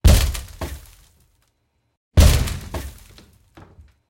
door wood old heavy kick open good impact 1 with rattle 1 without
door, heavy, impact, kick, old, open, rattle, wood